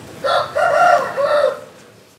galo cantando
rooster, chicken, cock, galo, chickens